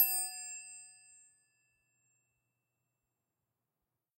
Soft wrench hit F#3
Recorded with DPA 4021.
A chrome wrench/spanner tuned to a F#3.
ring, percussive, Wrench, high, spanner, harmonics, chrome, hit, tonal, dissonant, metal